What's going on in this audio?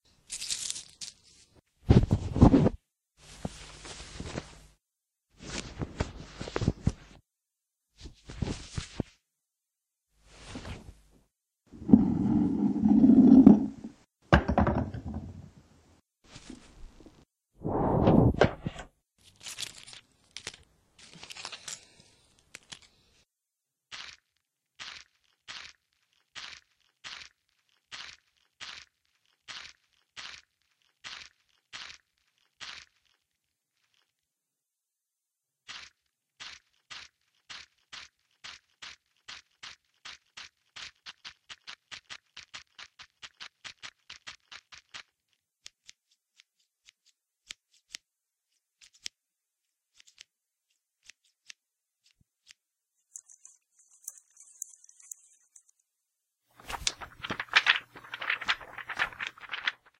About a minute of random folly I recorded for animation some projects.